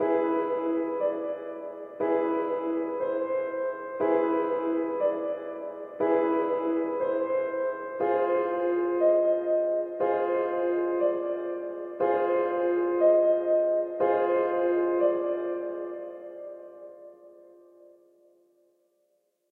lonely piano7 60bpm
ambience, ambient, background, cinematic, dramatic, film, horror, instrument, interlude, loop, mood, music, piano, spooky, suspense, trailer